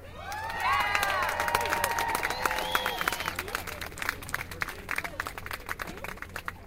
Stereo binaural recording of a small crowd cheering and clapping.
applause, small, field-recording, cheering, crowd, clapping, clap, whoo